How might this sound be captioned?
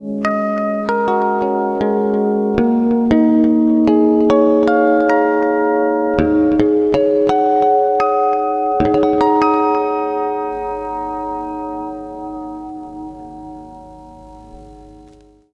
guitar.clean.harmonics.01
natural harmonics played with Ibanez electric guitar, processed through Korg AX30G multieffect